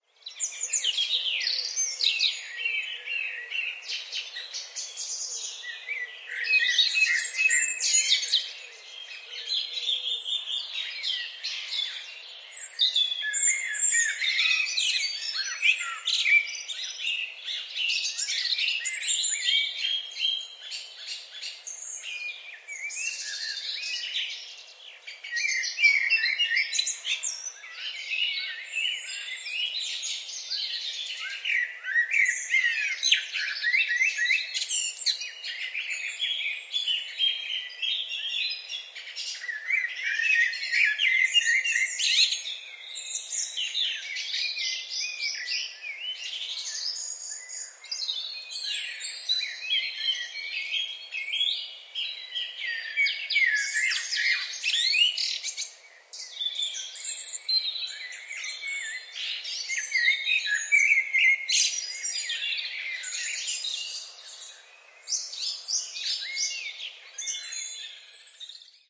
Vogelenzang Birds
This recording was made in Vogelenzang, the Netherlands, at sunrise on Pentecost Sunday 2013. Vogelenzang translated into English is, "Birds Song" - go figure! This recording was made using a Zoom H4 resting on the ground.
ambience, bird, birds, birdsong, birds-singing, field-recording, forest, nature, Pentecost, Pinksteren, sunrise